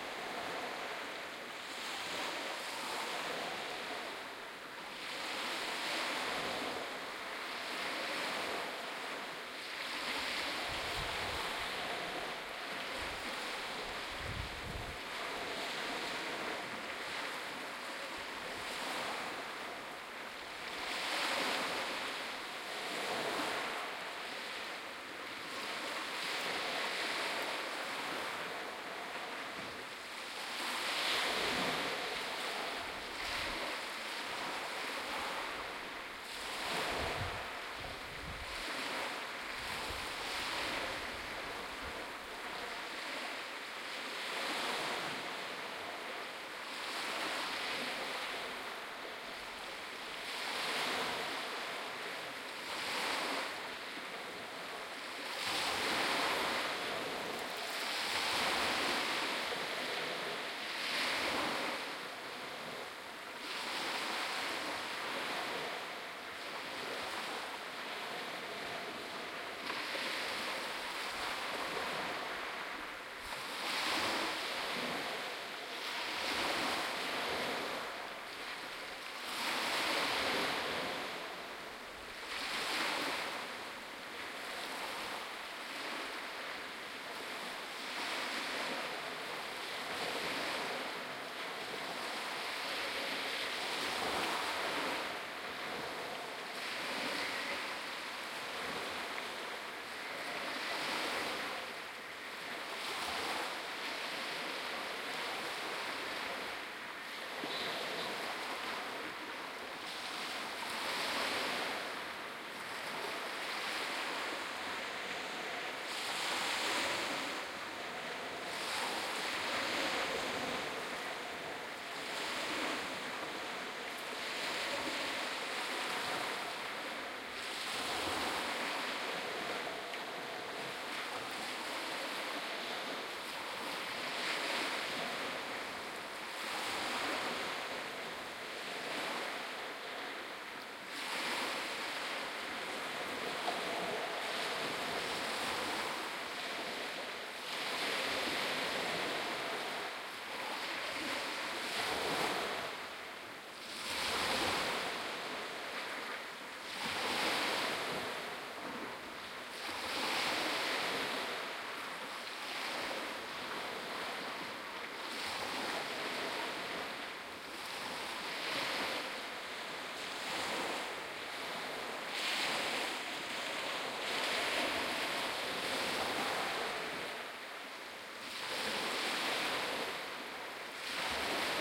Auchmithie harbour
This recording was made on a sunny day in April, again on the east coast of Scotland at a place called Auchmithie, using the Sony HiMD MiniDisc Recorder MZ-NH 1 in the PCM mode and the Soundman OKM II with the A 3 Adapter. The beach was full of pebbles.